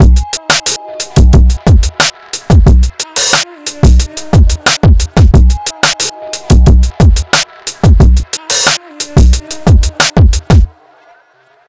hip hop dub.
This drum loop has a dubby vibe to it
drums, funky, groovy, hop, percs, dance, loop, beat, hip, beats, percussion-loop, drum-loop